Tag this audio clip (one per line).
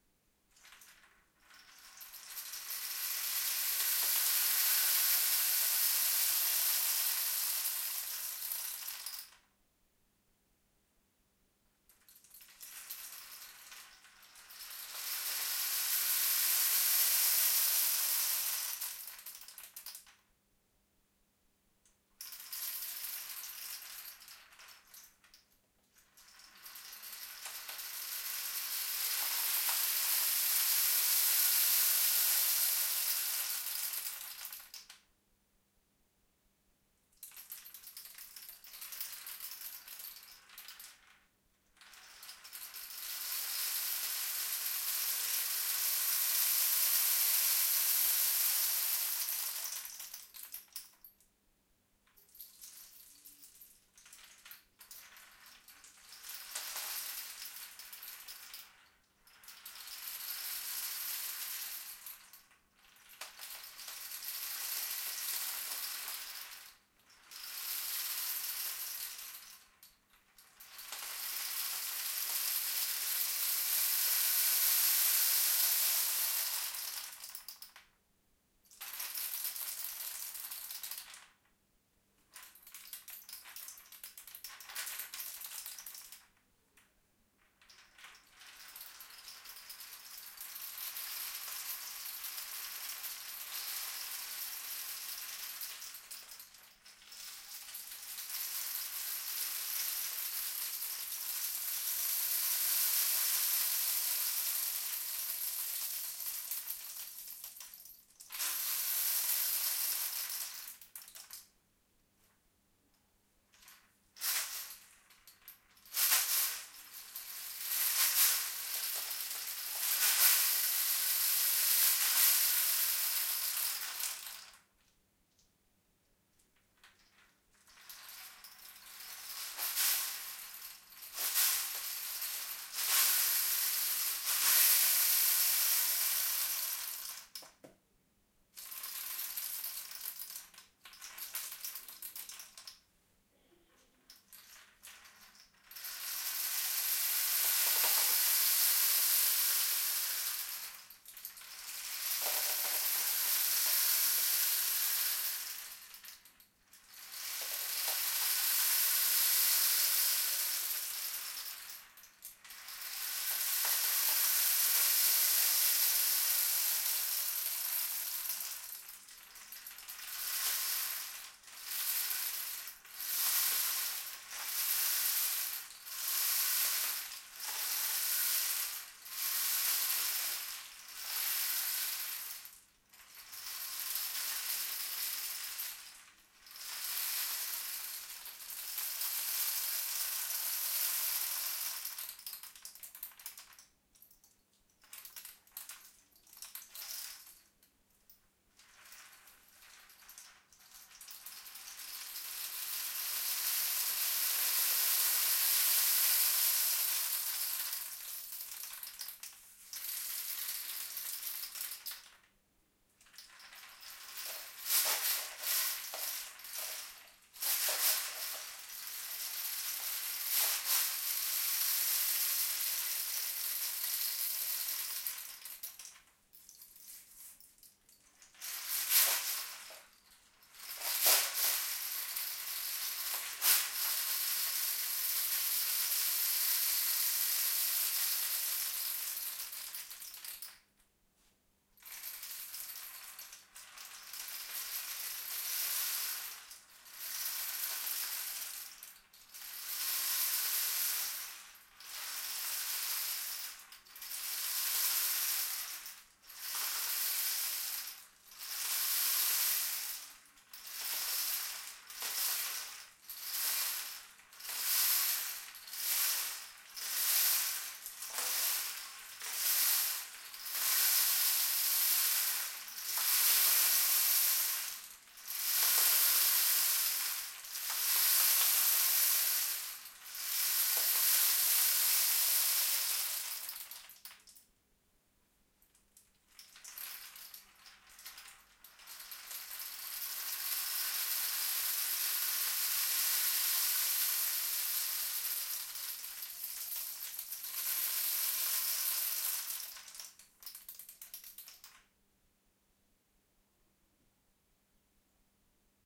ambience
de
field-recording
homemade
lluvia
nature
palo
rain
rainstick